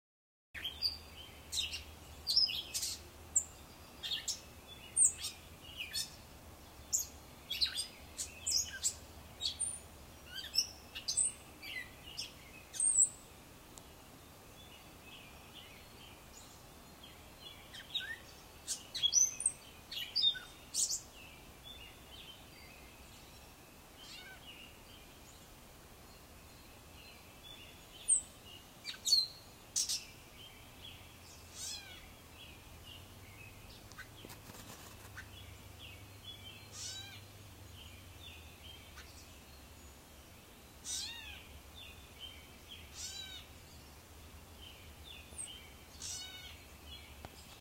I think it is a Wren, Robins in background.